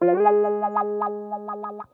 GTCC WH 09
bpm100, fm, guitar, samples, wah